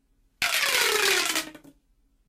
One of those bendy straw tube things